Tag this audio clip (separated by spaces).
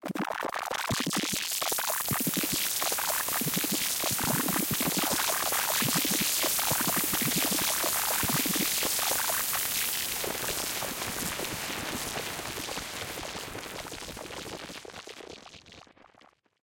future whoosh fx freaky sci-fi electronic swosh glitch sfx noise abstract swhish sound woosh effect strange swish soundeffect weird sounddesign swoosh